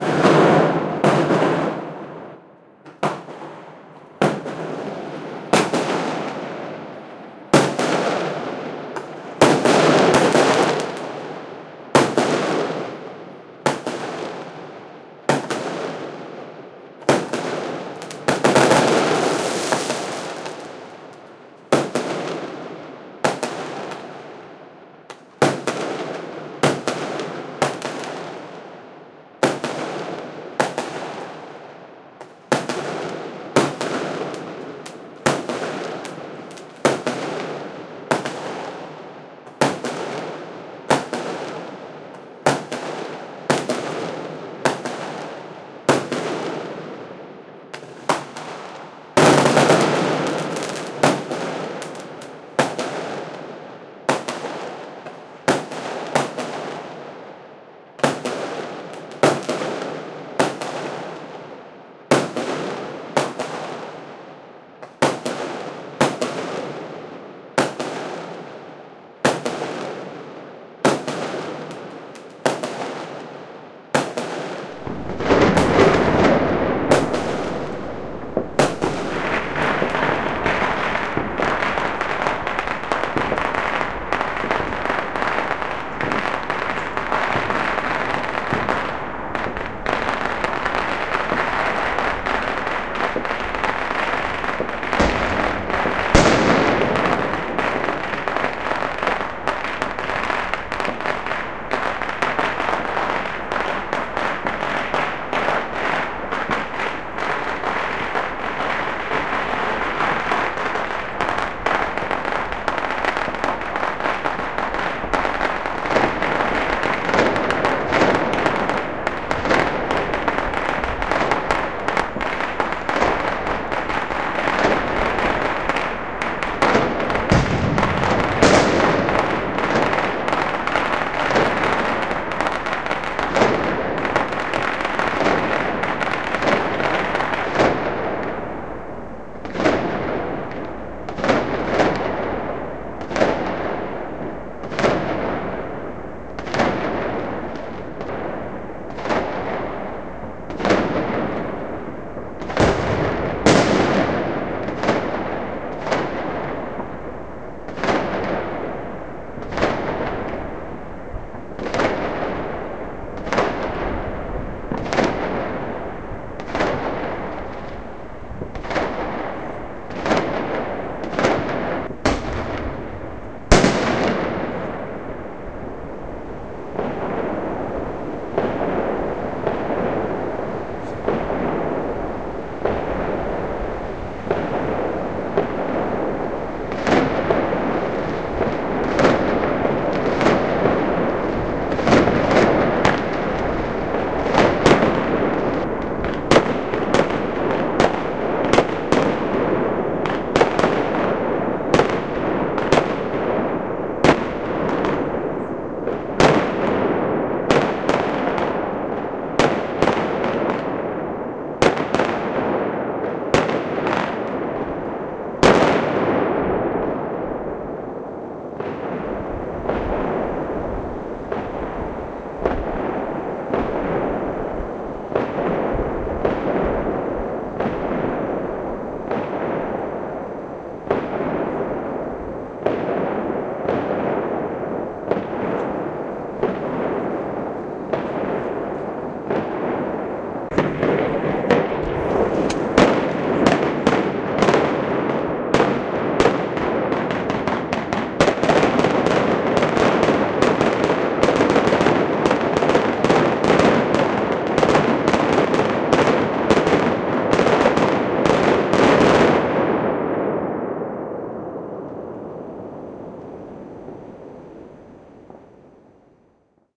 Shanghai Fireworks
Fireworks recorded at ear-level from the 25th floor of a high-rise building during the week long barrage of the Chinese New Year Holiday. Shanghai, China
bang, barrage, celebration, China, Chinese, crack, explode, explosion, festival, fire, firework, fireworks, holiday, loud, new-year, rocket, Shanghai, sparks, Spring-Festival